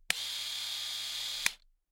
Electric razor 4 - normal mode short
A recording of an electric razor (see title for specific type of razor).
Recorded on july 19th 2018 with a RØDE NT2-A.
beard, electric, electricrazor, hygiene, Razor, razorblade, shave, shaven, shaver, shaving